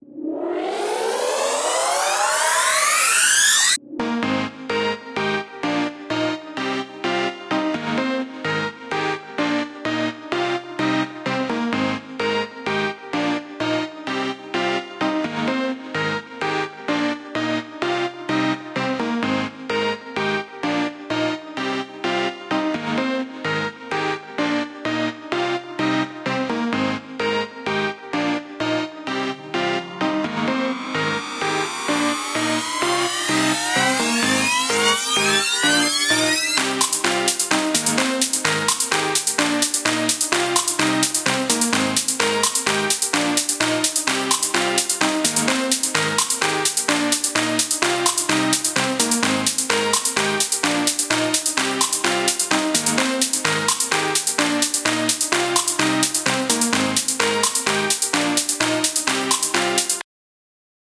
Pop Music
pop, Electric, Music, Sound